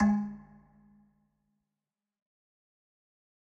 Metal Timbale 015
kit, drum, record, timbale, pack, trash, god, home